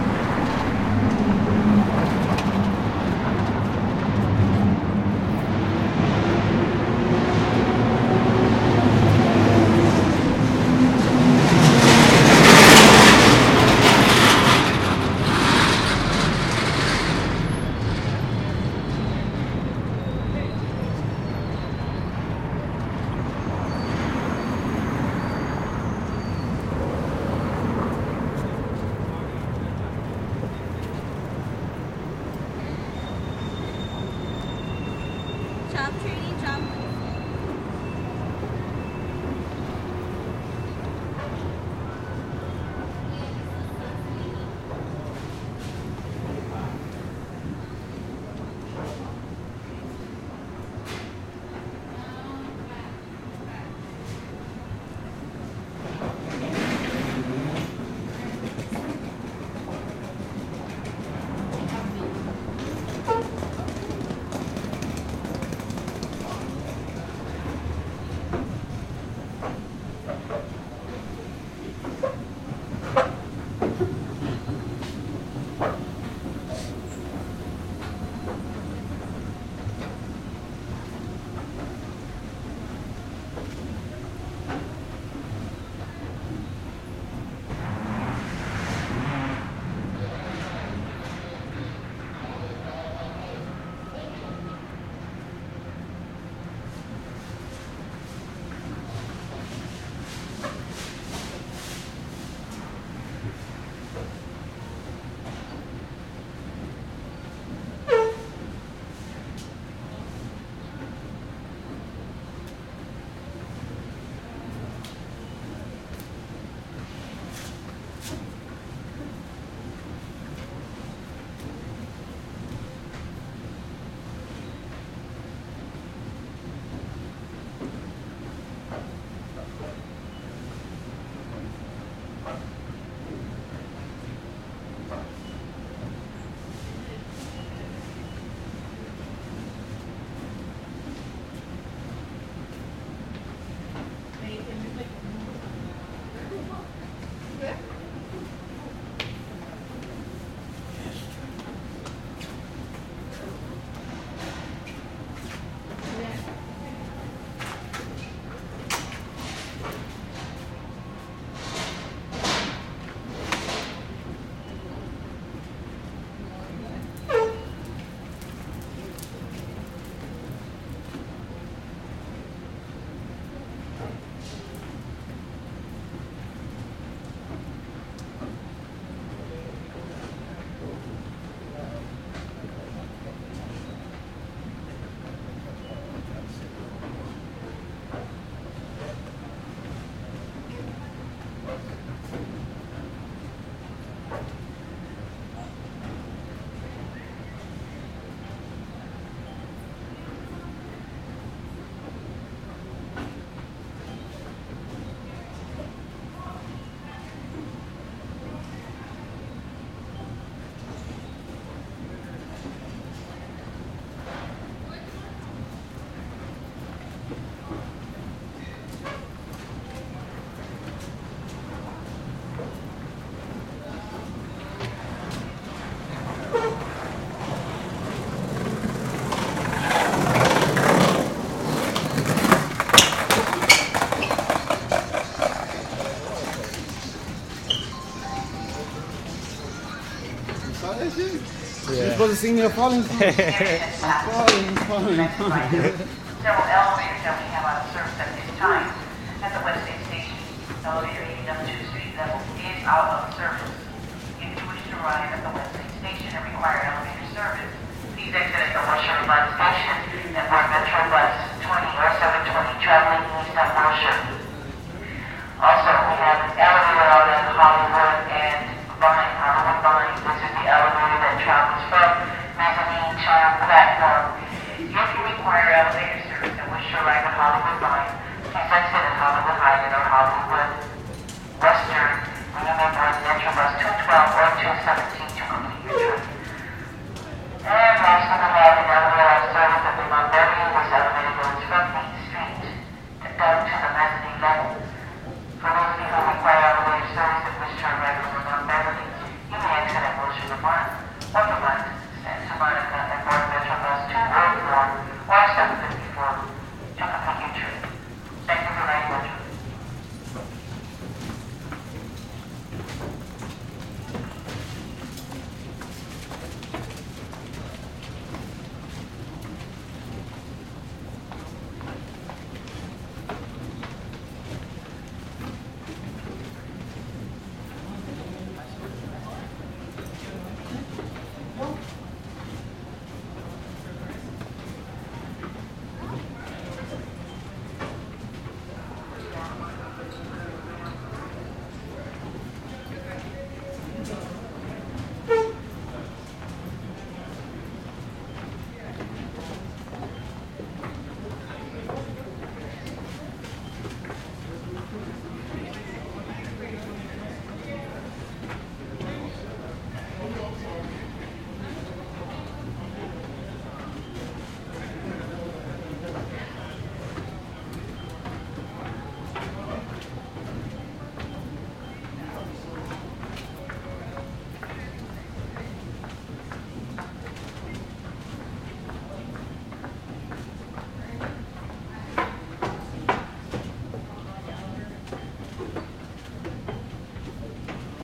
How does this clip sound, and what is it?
Downtown LA 07
One in a set of downtown los angeles recordings made with a Fostex FR2-LE and an AKG Perception 420.
street, angeles, downtown, urban, field-recording, traffic, ambient, field, ambience, city, people, recording, los